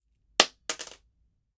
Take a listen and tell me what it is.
coin drop wood floor 2
penny falling onto a wooden floor
coin, drop, floor, wood